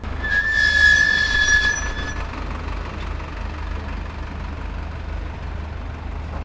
A truck with squeaky breaks comes to a stop and idles.